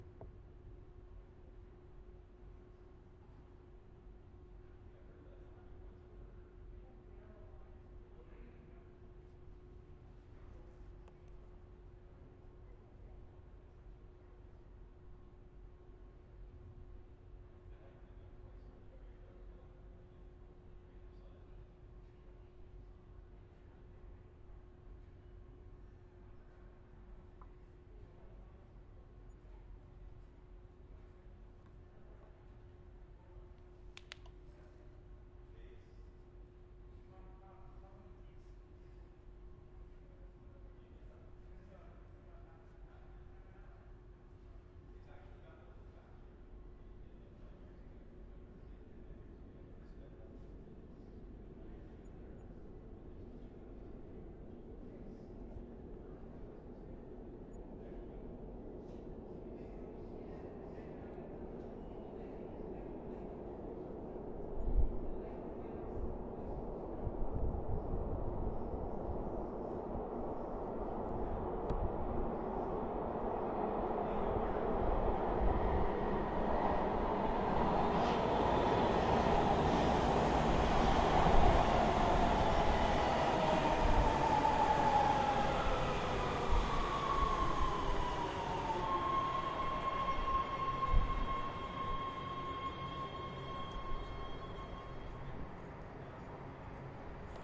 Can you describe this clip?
Subway Arriving Los Angeles
Station, Subway